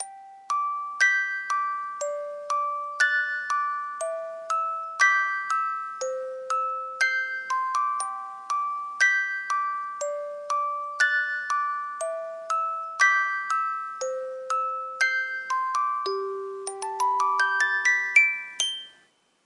Music Box (G, 4/4, 60 BPM)
baby, child, cute, happy, kid, melody, musicbox, relaxing, sleep, smile, story